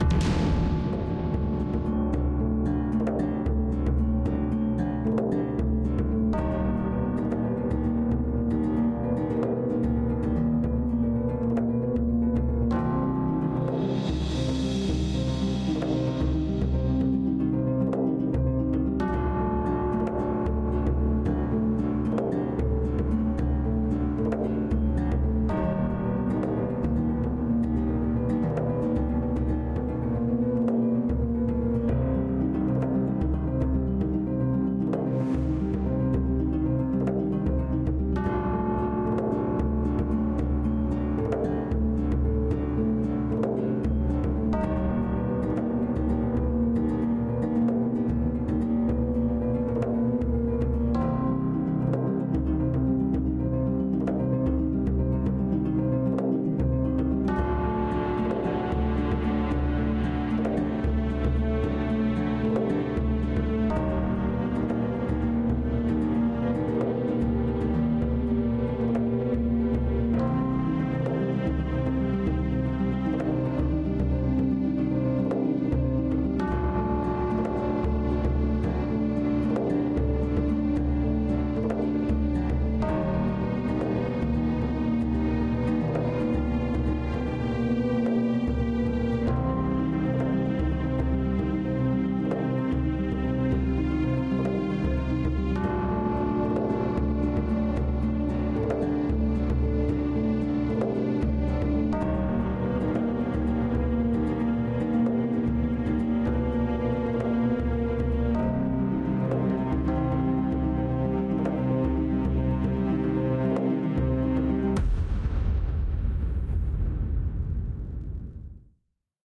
Thriller Documentary Pack #1
In fact, this record I added is not a carefully watched record. It's an arrangement I made overnight. I did, though, because I thought the lovers would come out. It's a little nervous. Maybe a mysterious event can be used in a documentary or presentation. If you want to improve it I can make some effects and editing. Bon Appetit :)